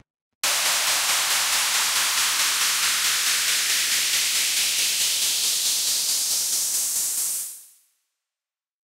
This is a loud noise riser I created using Harmor
noise, sweep, buildup, white, loud, uplifter, riser